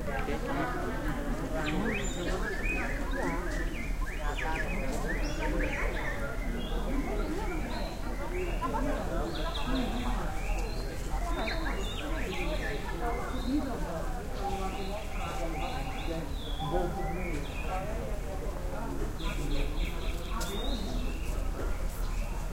20070620 092618 front garden sultan palace jogjakarta
Birds and people in front of the Sultan palace Jogjakarta. Java, Indonesia.
- Recorded with iPod with iTalk internal mic.
field-recording, birds, people, indonesia